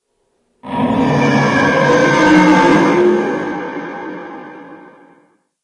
This is a recording of a plastic soda straw being pulled up and down through the lid on a plastic 32-oz. soft drink cup! I recorded it using a Logitech USB mic sitting here at my computer desk, about a half hour after polishing off the soft drink LOL! I happened to pull the straw out, and noticed the groaning noises it made as it scraped against the plastic lid. So I recorded it with Audacity. The deep pitch sounds are the straw being pulled out, and the high-pitched sounds are the straw being pushed back in. I separated those two distinct sounds, made 2 copies each, overlapped them, and used the Audacity "gverb" effect on 'em with the room size setting maxed out. Then I lowered the pitch of the low sound about three steps and put one copy of it in the center and panned the other hard left. Then I panned one copy of the high-pitched sound, also lowered a few steps, over hard right and boosted to about 18db.
monster, howl, scream, horror, roar, groan